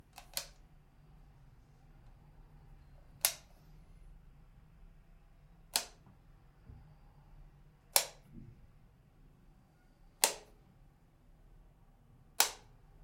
Using light switch.